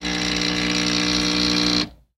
coffee maker noise
Buzzing sound emitted from a coffee maker. Recorded 02/17/2017.